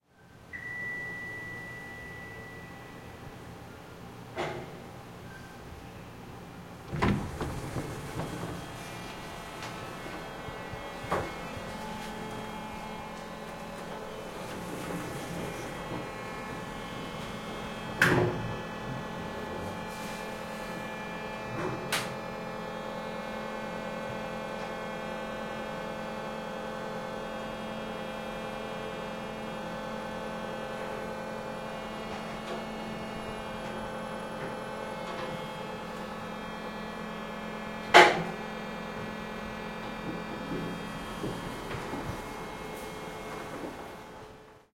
I recorded an old elevator, the arrival, the trip and the end of it. It made a horrible noise, made me feel anxious so it can be useful.
Recorded with a Sony ICrecorder
Postprocessed to cut low rumble in StudioOne3
Recorded at a hotel in Acapulco,MX
Ricardo Robles
Música & Sound FX